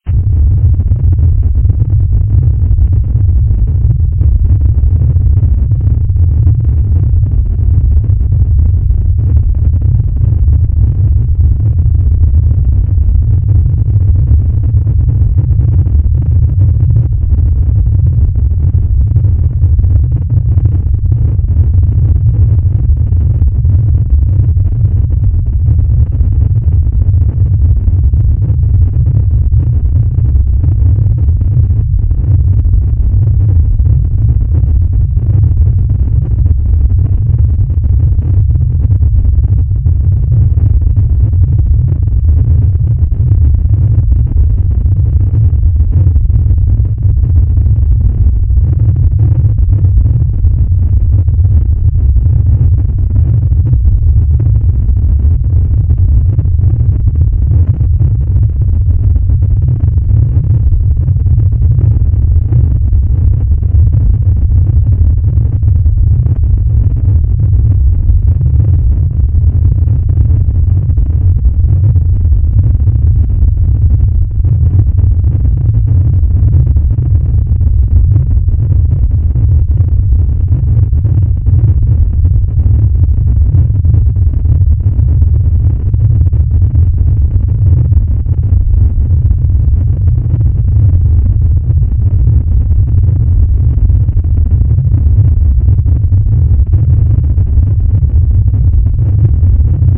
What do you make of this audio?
Rocket Thrust 01
Rocket Thrust
If you enjoyed the sound, please STAR, COMMENT, SPREAD THE WORD! 🗣 It really helps!
if one of my sounds helped your project, a comment means a lot 💙
Cinematic, Cutscene, Engine, Flight, Game, Jet, Launch, Missile, Rocket, Space, Spacecraft, Spaceship, Takeoff, Thrust, Universe